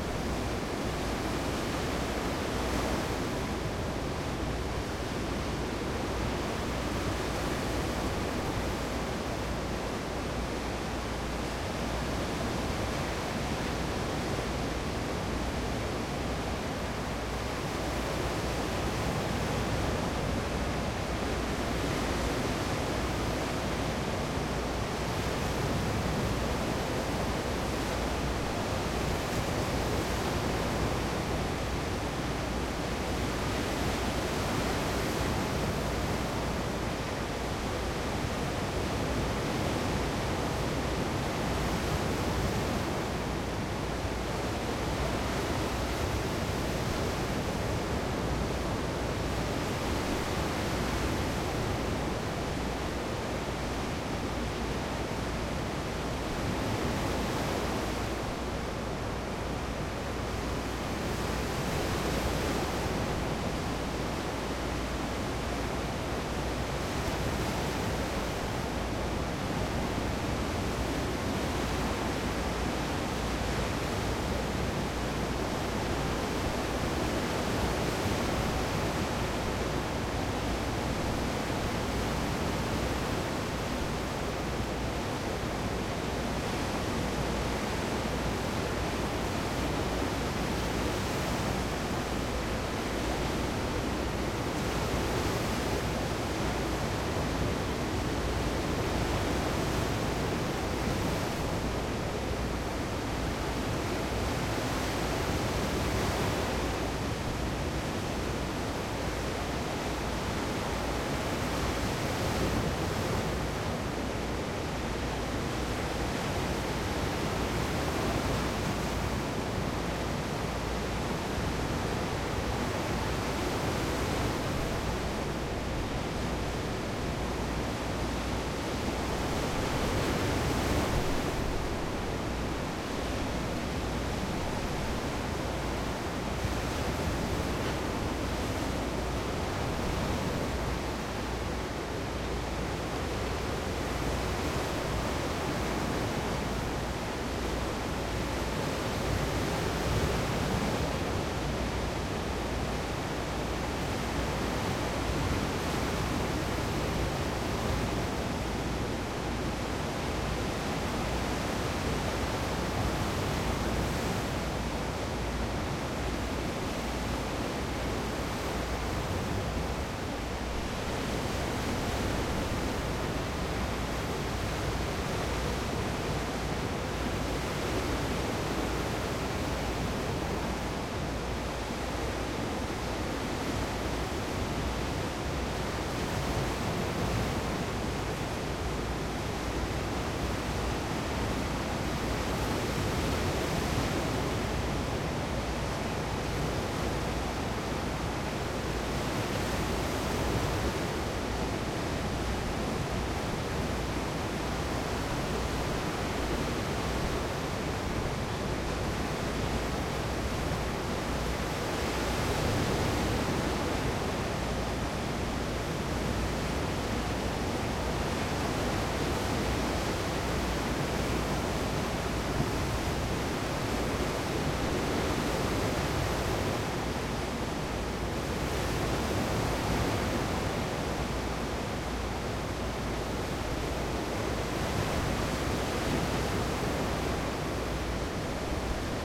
waves beach medium5 from balcony or heavy tropical storm
waves beach medium from balcony or heavy tropical storm
medium, storm, tropical, balcony, beach, waves